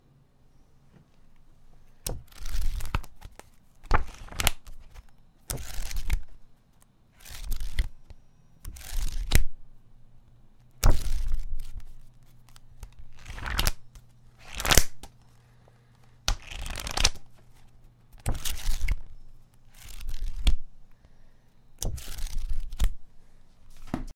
pages flipping
Flipping the pages of a soft cover manual. Recorded with a Neumann TLM 103.
book, flip, flipping, pages